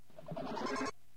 alien fan
Just took one of the samples and added an Alien effect to it with Goldwave. This is part of a pack that features noises made by a small malfunctioning house fan that's passed its primed.
domain, public, squeal, alien